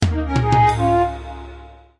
development-card-play
This short progression is originally intended to when the player plays a development card. Created in GarageBand and edited in Audacity.
digital, electronic, notification, synthesized